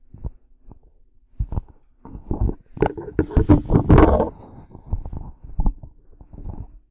just shifting the mic around
object, shift